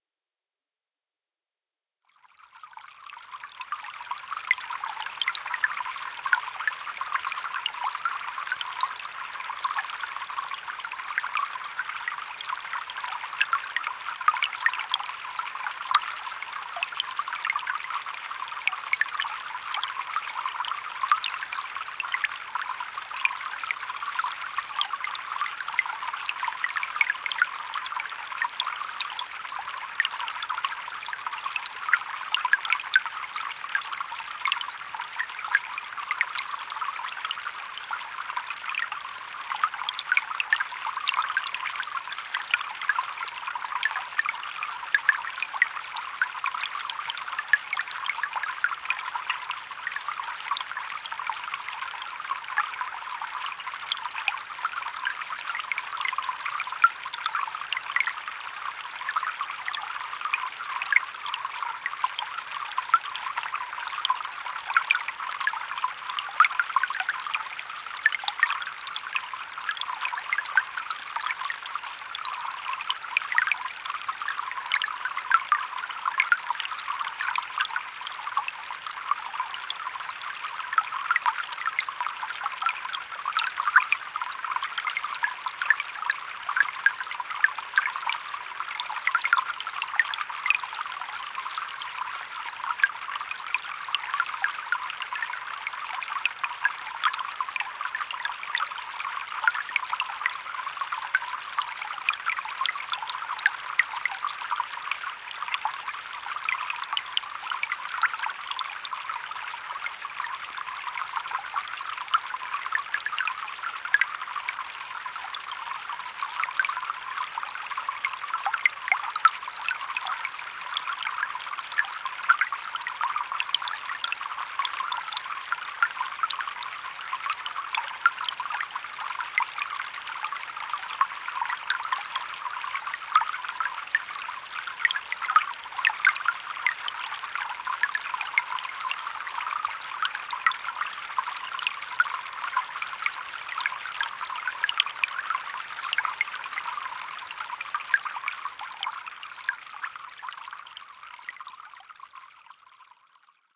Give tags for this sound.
boykin-springs; brook; bubbling; creek; dancing; east-texas; stream; tinkling; trickling

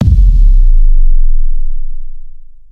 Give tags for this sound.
boomer; kickdrum

